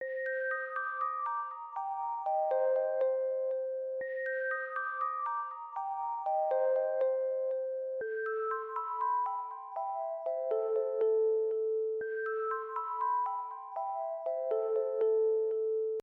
I am dreaming or Final Fantasy menu kinda thing
Little song loop made with Garage Band.
Use it everywhere, no credits or anything boring like that needed!
I would just love to know if you used it somewhere in the comments!
game, intriguing, loading, loop, music, relaxing, synth